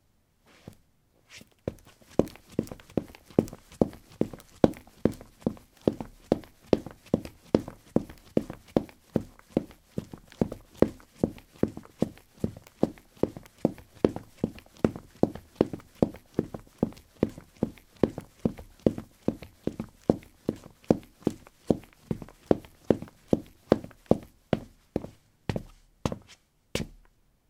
paving 16c trekkingshoes run
Running on pavement tiles: trekking shoes. Recorded with a ZOOM H2 in a basement of a house: a wooden container filled with earth onto which three larger paving slabs were placed. Normalized with Audacity.
step, footstep, steps, footsteps, running, run